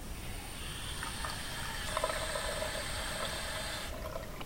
This sound is recorded by Philips GoGear Raga player.
There is recorded filling washbasin.
House, Splash, Washbashin, Water